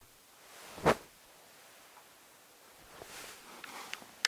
Throwing clothes on the floor #2
Throwing some pieces of clothes on the floor.